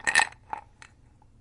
Ice in glass 4
Quiet sounds of ice cubes in a glass.